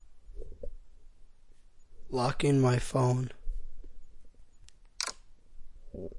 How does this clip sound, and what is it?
Locking my phone
Closing my iPhone, I used a Condenser mic to record this sound.
iPhone, lock